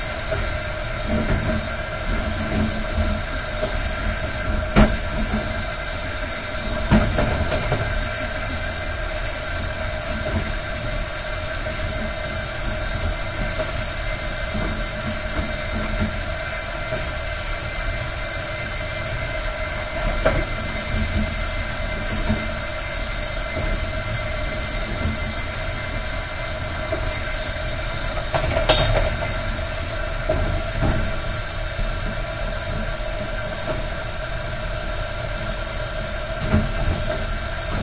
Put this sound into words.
train, Moscow to Voronezh
Railway carriage, WC (toilet) interior
Moscow - Voronezh train.
passenger-train, railroad, railway, train